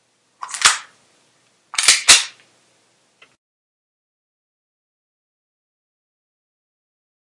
Gun loading
Mag put in and then cocked.
sounds, klack, action, arms, shot, weapons, bang